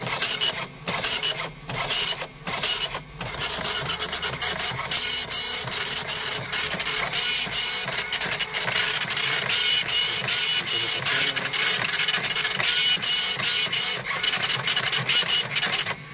Mancunian dot matrix printer
A truly Mancunian printer.
This okidata dot matrix printer has been used exclusively in Manchester for its 21 year lifespan from its installation at Manchester General Hospital on February 10, 1994 (first use on the morning of February 11, 1994) to its 2000 move to Manchester EGCC airport where it still prints to this day.
You can use this printer for a manchester project for you mancunians like me, or for any other project you wish.
Thanks to Andrew Williams who used to work at Manchester General Hospital and now working at EGCC for the info about this printer's long history.
Here's hoping for another 21 years out of this amazing and extremely durable printer. long live Manchester's printer!
happy downloading people, and Glorry man utd!
airport, computer, dot, hospital, machine, machinery, manchester, mancunian, matrix, office, oki, okidata, print, printer